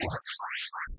evp staywithme4ever
"stay with me forever' caught by the florida ghost assassin squad with an image synth and rifle.
ghost, paranormal, evp